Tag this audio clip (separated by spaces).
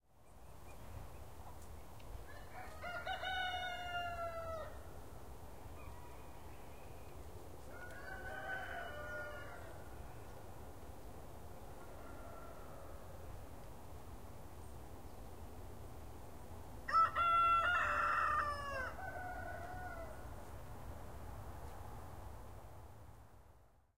birds early morning-sounds crowing early-morning bird morning roosters rooster